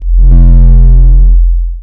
an electronic bass sweep sound